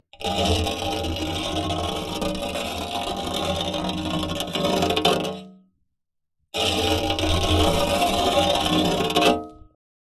MetalScrape-Piezo
Metallic scraping sound. Contact microphone recording with some EQ.
iron, metal, metallic, scrape, scraping, steel